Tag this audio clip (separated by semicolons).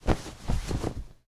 camping; canvas; fabric; rustle; tent